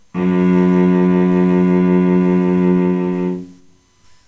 7 cello F#2 Gb2
A real cello playing the note, F#2 or Gb2 (2nd octave on a keyboard). Seventh note in a C chromatic scale. All notes in the scale are available in this pack. Notes, played by a real cello, can be used in editing software to make your own music.
violoncello, F-sharp, string, stringed-instrument, note, cello, scale, Gb, G-flat